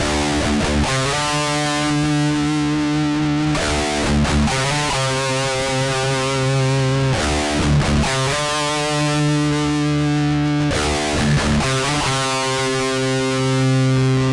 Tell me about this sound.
REV GUITAR LOOPS 16 BPM 133.962814
all these loops are recorded at BPM 133.962814 all loops in this pack are tuned 440 A with the low E drop D
13THFLOORENTERTAINMENT, 2INTHECHEST, DUSTBOWLMETALSHOW, GUITAR-LOOPS, HEAVYMETALTELEVISION